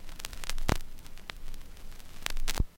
Short clicks, pops, and surface hiss all recorded from the same LP record.